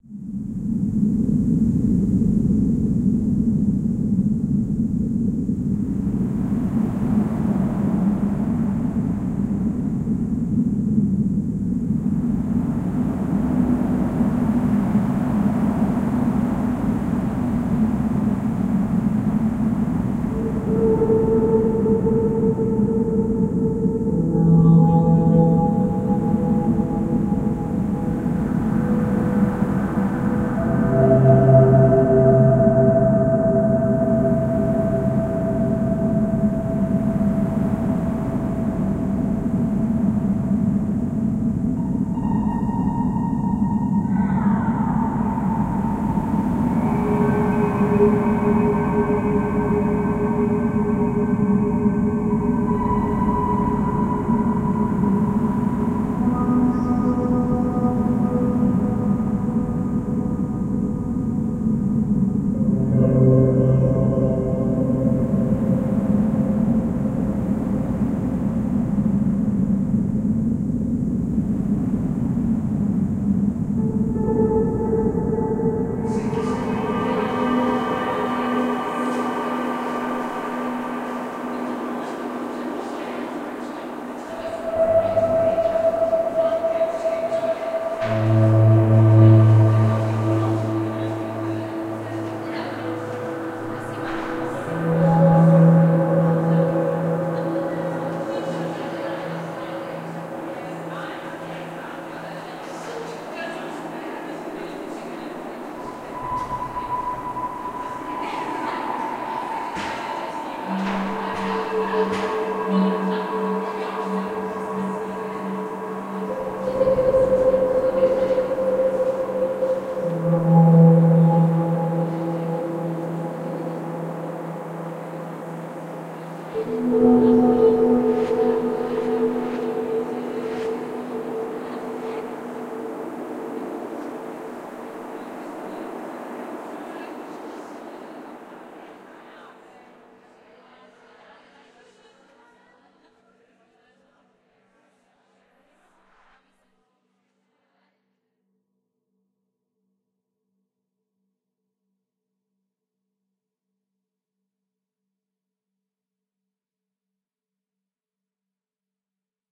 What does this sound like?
Eerie Ambience
Eerie background soundtrack
background, deep